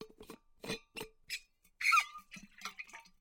The lid being screwed on a metal drink bottle